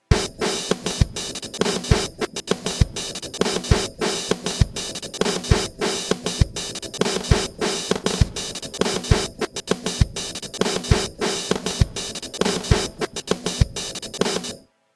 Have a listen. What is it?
Melodic succession 100 6-8
Catchy beat with an awesome end
catchy, loop, beat